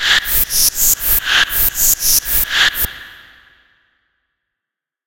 robot sound, breath_bot